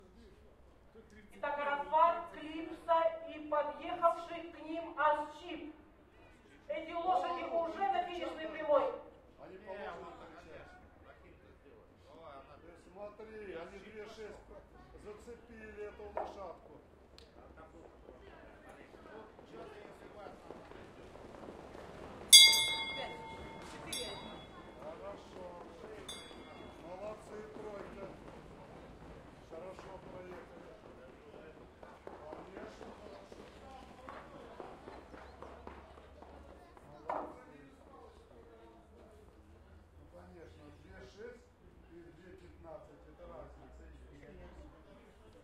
hippodrome.race #3 finish
Finish of the race #3.
Recorded 2012-09-29 12:30 pm.
announcer, racetrack, broadcaster, voice, speech, Omsk, hippodrome, 2012, horse, race, bell, russian-speech, speaker, russian, Russia